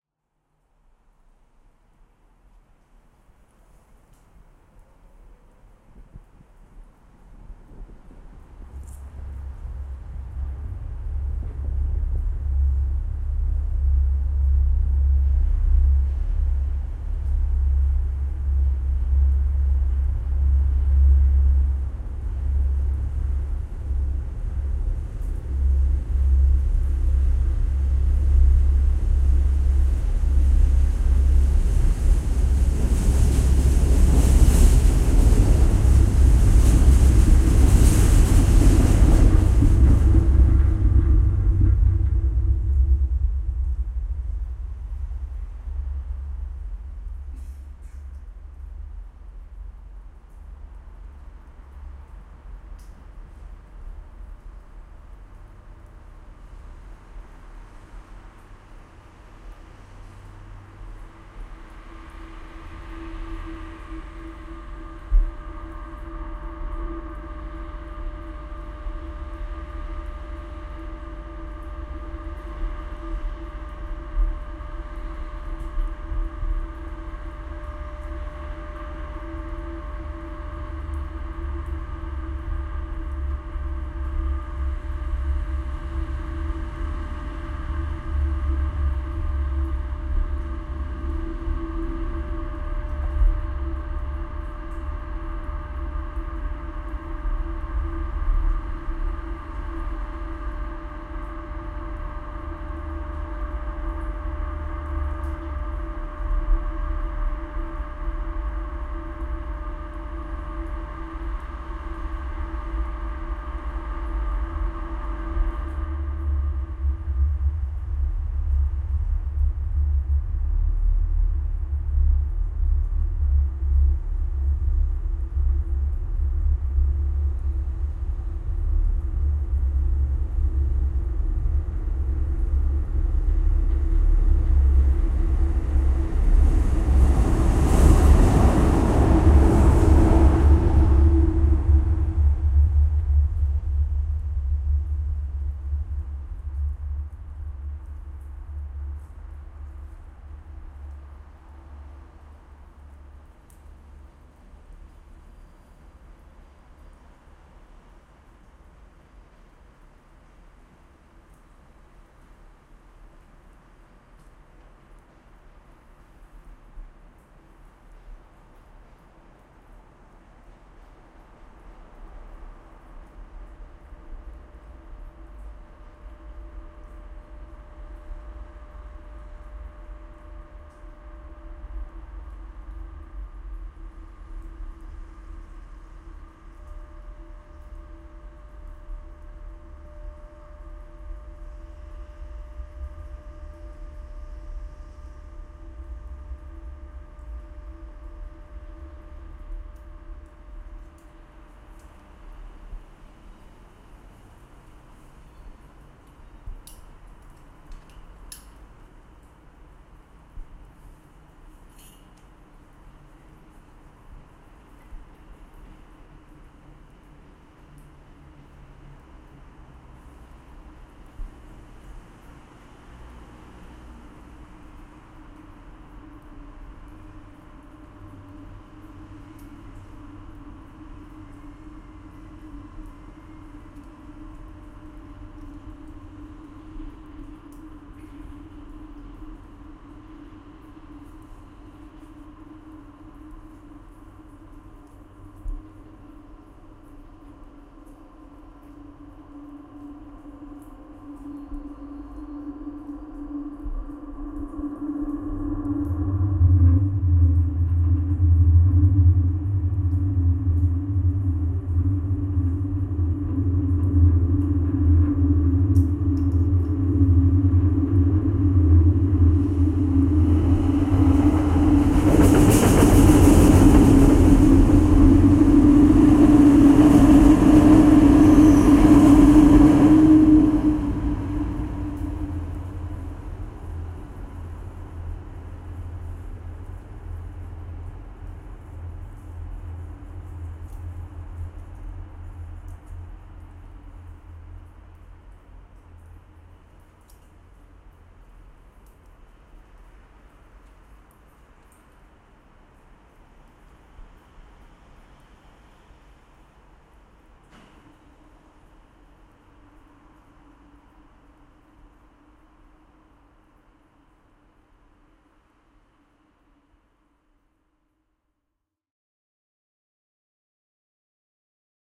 Stereo file of Newcastle's Metro. Left channel is a contact mic attached beneath a Metro bridge. Right channel is the ambience. Internal sounds of the rail-line with external environment.
Recorded with Zoom F8, DPA 4060 and JrF Contact Mic